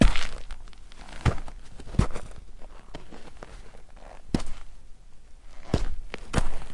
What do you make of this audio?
fotsteg på trä och snö 1
Footsteps in snow and on tree. Recorded with Zoom H4.
footsteps, tree, snow